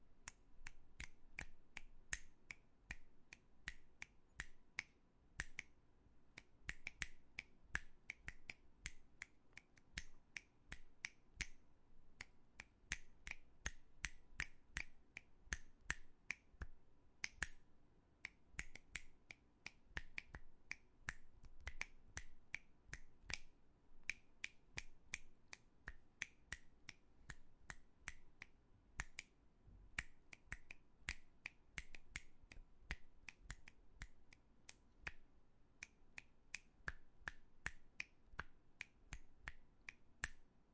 Snapping Fingers
A recording of my boyfriend snapping his fingers to a tune.
tune, fingers, snapping, snap